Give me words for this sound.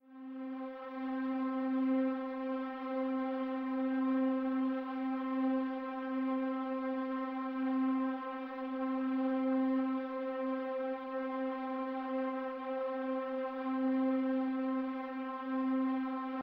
Yet another sound synthesized for use in the first collab dare.
My parter wanted to use some strings sounds and shared a sample with me as an example. There were other sounds to play at the same time so I designed these thin strings in Reason's Maelstrom synth (using a hign pass filter for the thin sound) so they would sit well in the mix without the need to EQ.